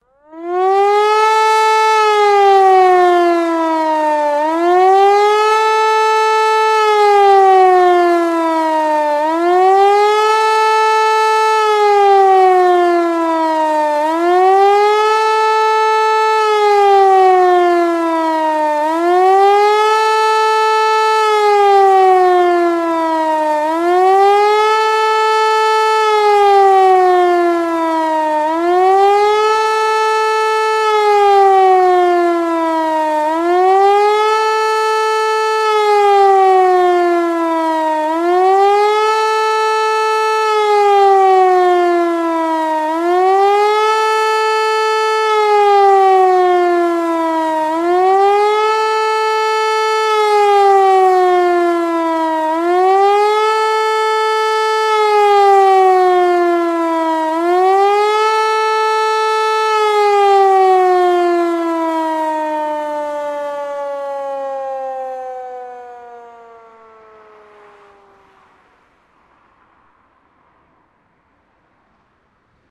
HLS Warnung Köln-Niehl
Unbearbeitete und nicht übersteuerte Aufnahme einer HLS273 Sirene in Köln bei der großen Sirenenprobe im Oktober 2015. Signal: Warnung.
Unedited Recording of a german electro/mechanical/pneumatical-siren (type HLS273, manuf. by company Hörmann) at the big siren-testing in the Cologne area, in october 2015. Signal: Warning.
alarm, all-clear, allclear, civil, defense, disaster, emergency, mechanical, raid, signal, siren, sirene, warning